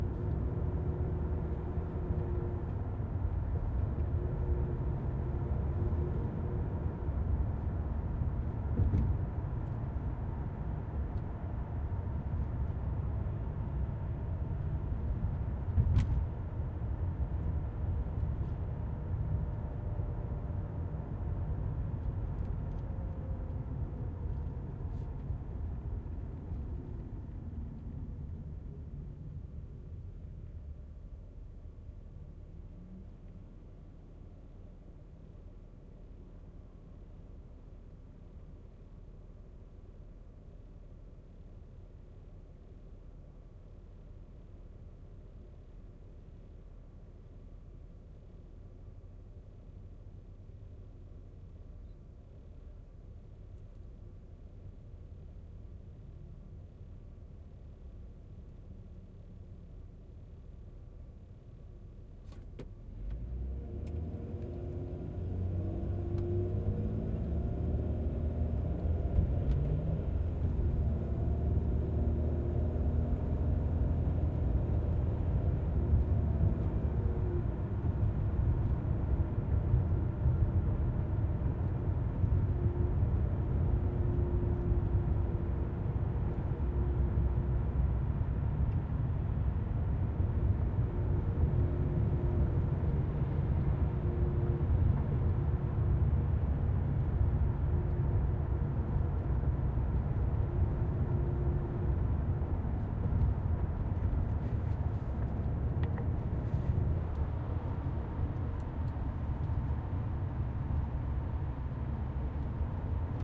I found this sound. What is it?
Car interior driving Impala-7eqa 01-02
Recorded with Zoom H4N, ambience recording. Basic low cut filer applied.
ambience, backgrounds, field-recording